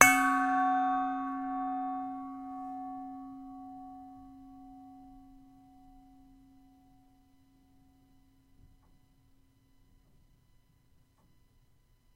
This is a bell / chime sound
I hit a bowl to create this sound
Recorded on a Yetti Blue Microphone 2015